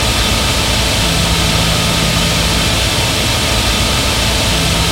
Created using spectral freezing max patch. Some may have pops and clicks or audible looping but shouldn't be hard to fix.
Background, Freeze, Perpetual, Sound-Effect, Soundscape, Still